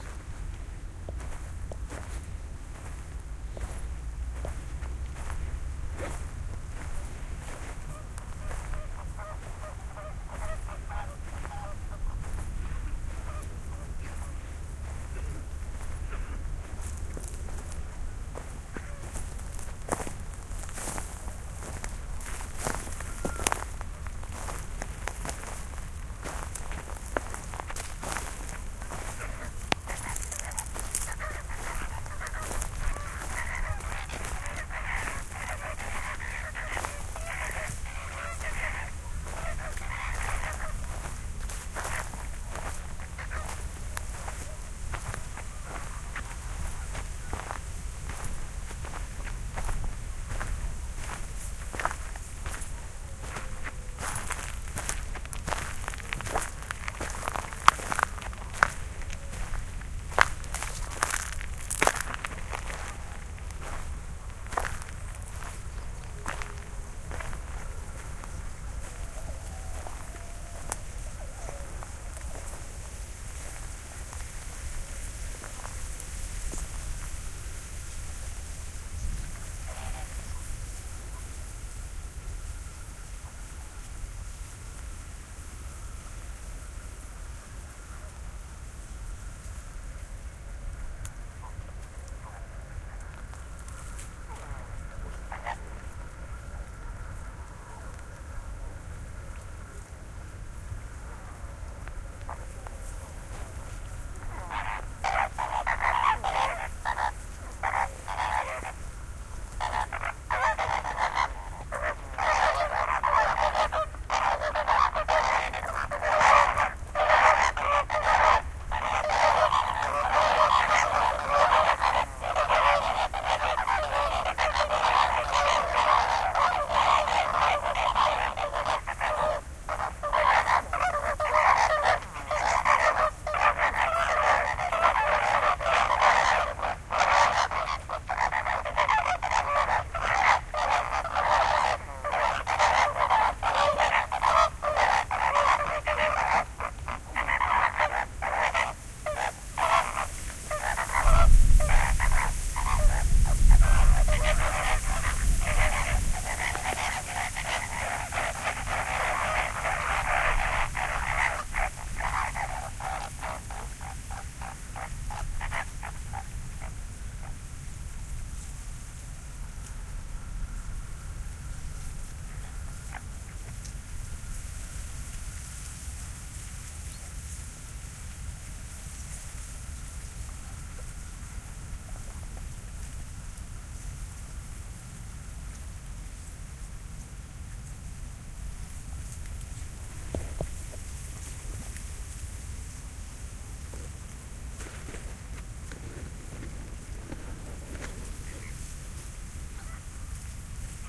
flamants pilou
In the south of France near "Villeneuve les maguelones ". i walked thru wet land to record a group of Flamingo . From 0 to 1"30 i walked . At 1"45 the flamingo began their discussion.
Recorded with nagraIVs and a pair of beyerMC930 in Ortf configuration
bird, field, flamingo, land, oiseaux, pink, recording, walk, wet